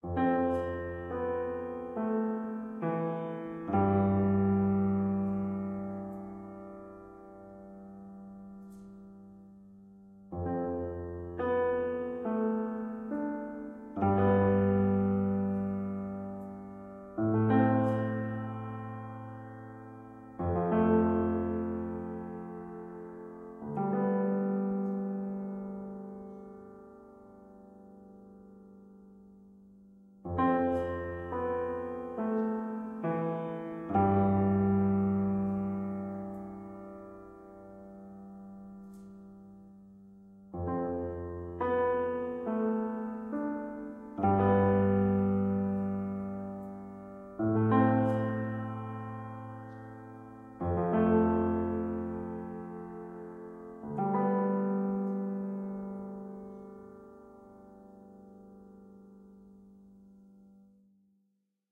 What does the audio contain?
Sad boy
My second recording with fortepiano.
Please, do not forget to indicate me. It's can be HELPFUL for me.
Enjoy :)
ambience, ambient, atmosphere, background, background-music, background-sound, boy, children, cinematic, day, drama, dramatic, field-recording, film, fl, fortepiano, kid, little, movie, pianino, piano, rain, rainy, reverb, sad, studio